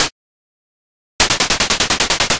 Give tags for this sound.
video-game; arcade; 8-bit; asset

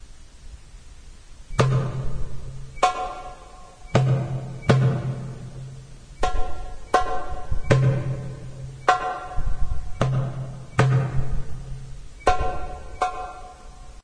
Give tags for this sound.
andalusian; arab-andalusian; compmusic; derbouka; moroccan; muwassa; mwessa3; percussion; qaim-wa-nisf; solo

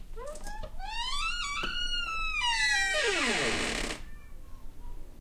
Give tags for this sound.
doors; crackle

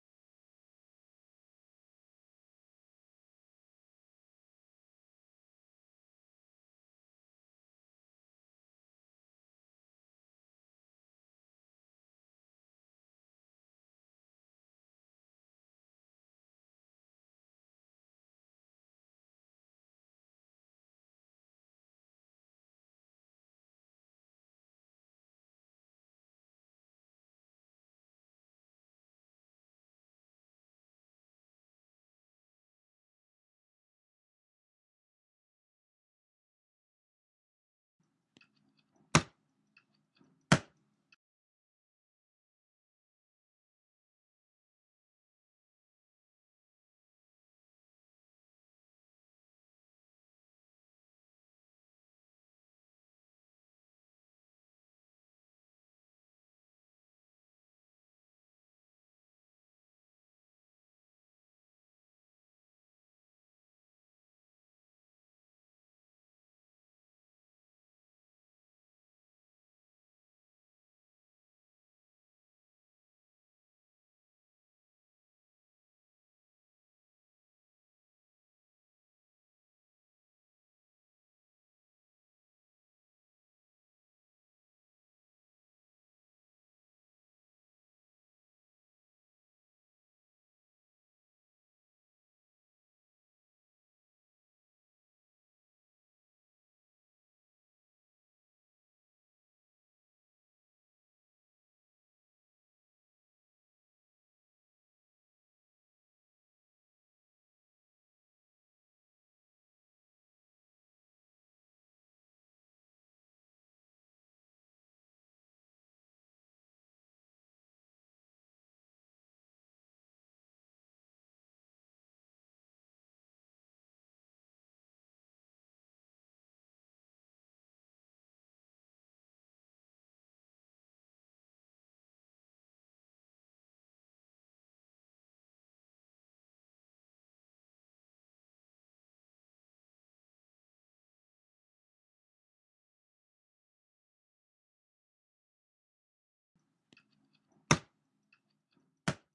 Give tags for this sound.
Hits; Desk

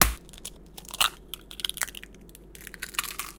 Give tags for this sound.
domestic-sounds; cooking; kitchen; field-recording

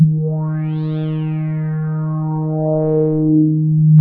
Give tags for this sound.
multisample; tractor-beam; synthesis; subtractive; little-allen

